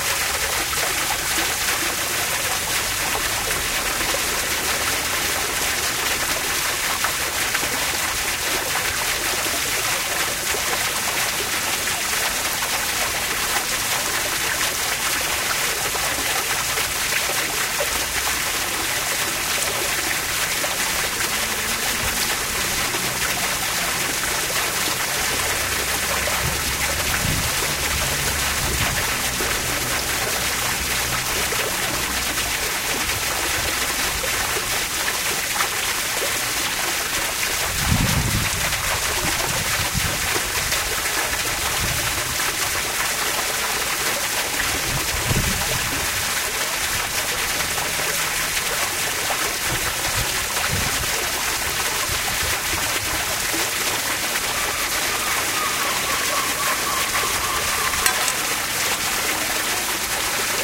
Pond Fountain loud
Recorded next to a large pond water fountain
running-water, pond, water-fountain, water, waterfall